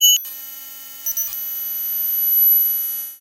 PPG 009 Noisy Digital Octaver G#5
This sample is part of the "PPG
MULTISAMPLE 009 Noisy Digital Octaver" sample pack. It is a digital
sound effect that has some repetitions with a pitch that is one octave
higher. In the sample pack there are 16 samples evenly spread across 5
octaves (C1 till C6). The note in the sample name (C, E or G#) does
indicate the pitch of the sound but the key on my keyboard. The sound
was created on the PPG VSTi. After that normalising and fades where applied within Cubase SX.
digital, experimental, multisample, ppg